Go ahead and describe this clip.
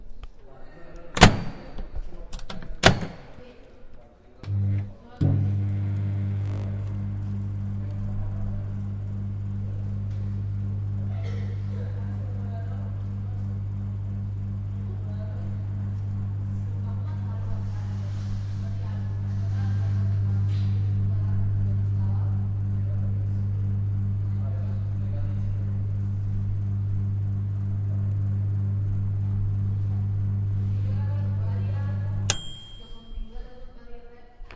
Using microwave at Poblenou Campus UPF bar.
electric, machine, microwave, hit, Poblenou, Campus, UPF, bar